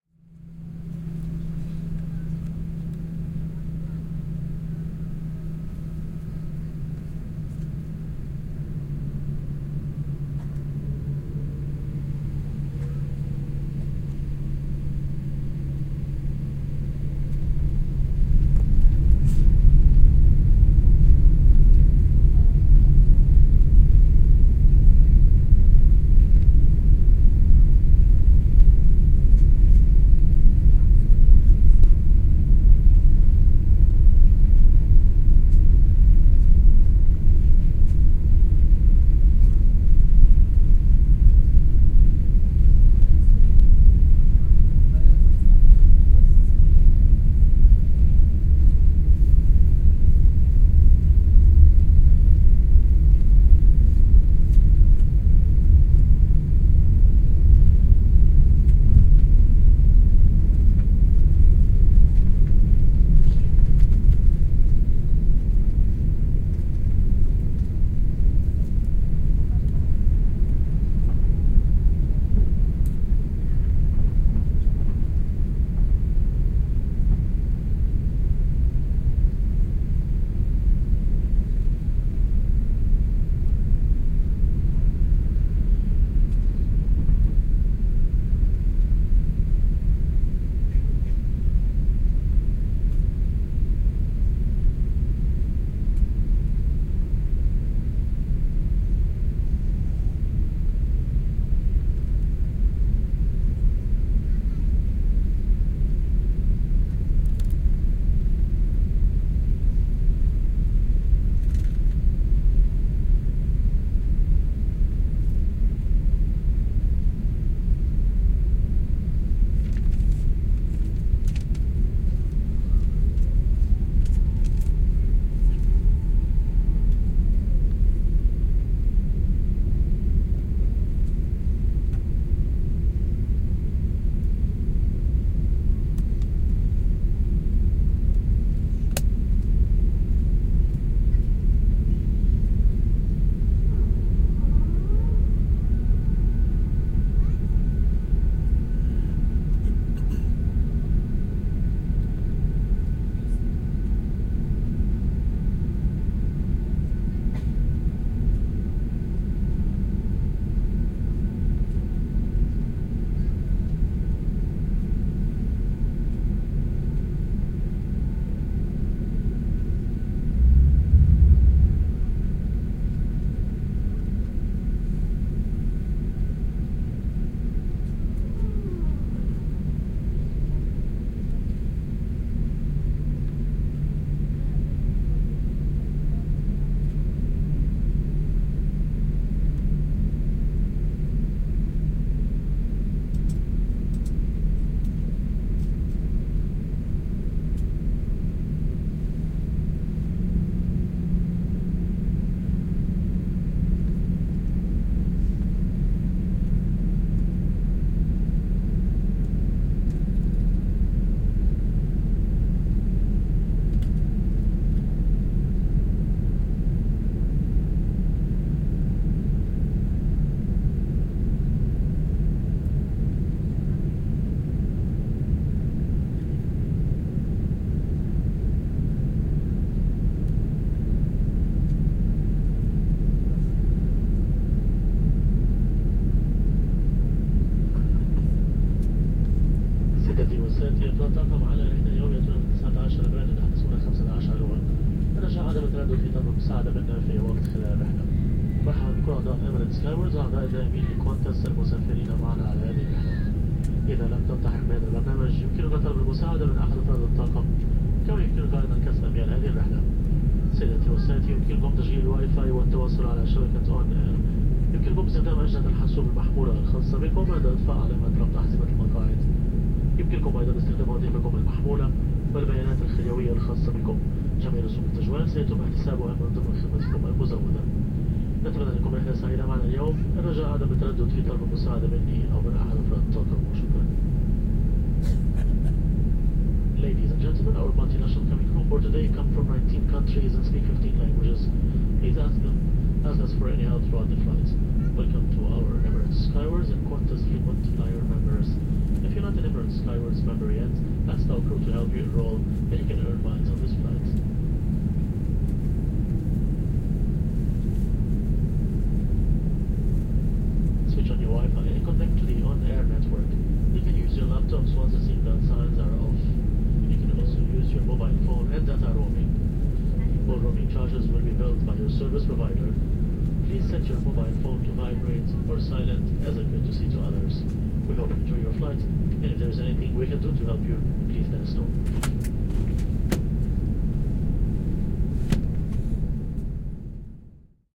SEA 17 Dubai, Airport, inside Airbus A380 Take Off
Take off and following cabin ambience of an Airbus A380 from Dubai Airport (Emirates).
Less spectacular then excpected - most quite take off, I've ever experienced :-)
Use headphones or a good subwoofer for the rumbling during acceleration at 20s.
Announcement at 4m in Arabic and English.
Date / Time: 2017, Jan. 26 / 11h58m